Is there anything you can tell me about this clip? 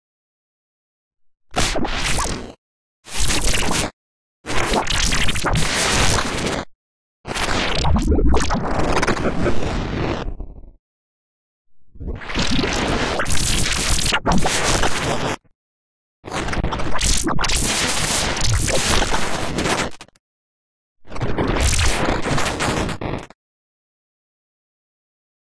Custom programmed granular synthesis sampling instrument